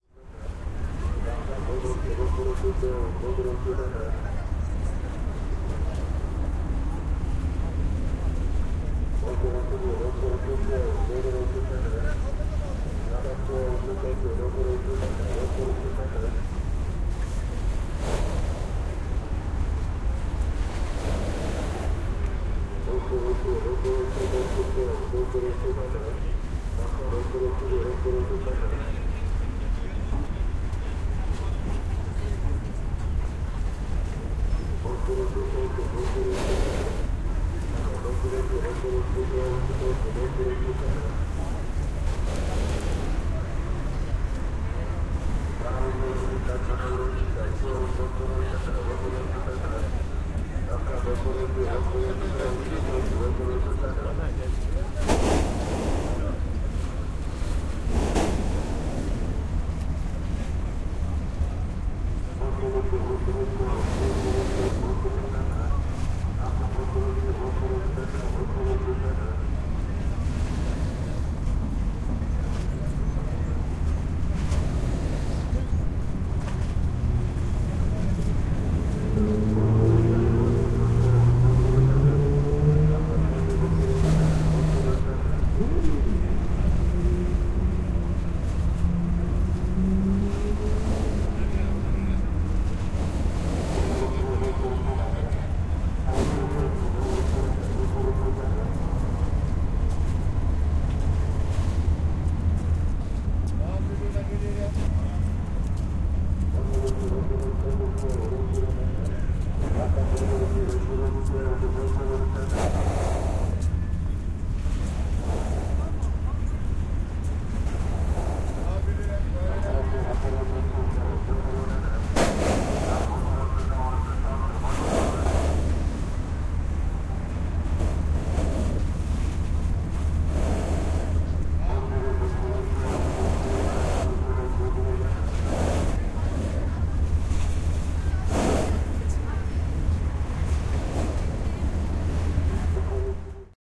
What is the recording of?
eminonu-iskele
This is recorded in Eminonu, Istanbul, and has the sounds near the area where people board the boats.
eminonu, istanbul